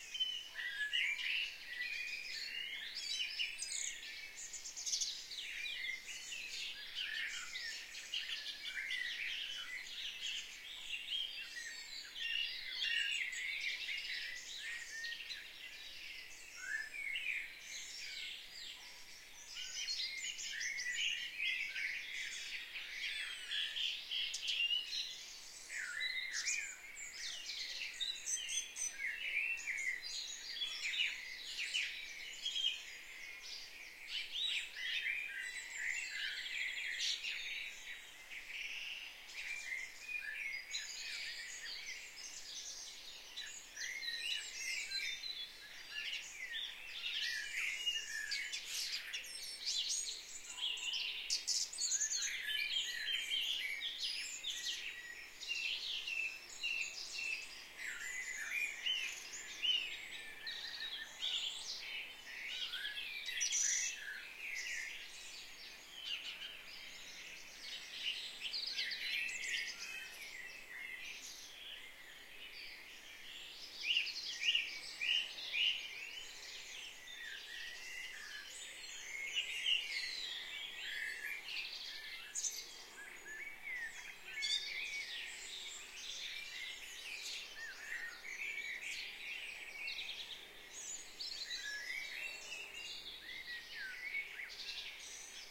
Dawn birdsong May 14th 2018 2
Dawn birdsong recorded mid side. No traffic and no planes.
soundscape, field-recording, ambient